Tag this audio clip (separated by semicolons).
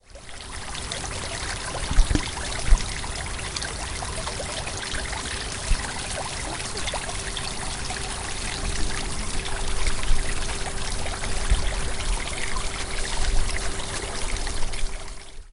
fountain; park; water; stream; aigua; field-recording; deltazona; font